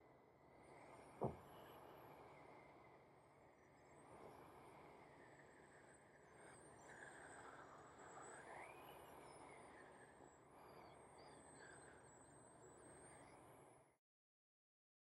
This is a sound make from someone whistling to sound like wind. It sounds like wind coming in through a crack under a door or a window. It was recorded on a tascam DR-40 and further manipulated in Reaper.
Whirring, Wind